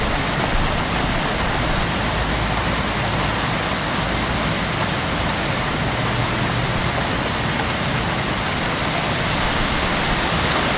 Rain on stoneplates
Recorded with ZOOM H1 outside my door when a great rain came falling.
ambience, cinematic, field-recording, narure, natural, outdoor, soundscape